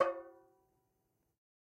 Metal Timbale right open 012
god, conga, open, real, record, trash